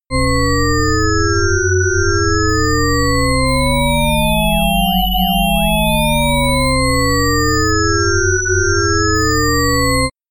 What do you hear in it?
Mixed Rising and Falling Shepard Tone
a mixed of a rising tone and a falling tone. Made using the Audacity Nyquist plugin, 10 seconds in length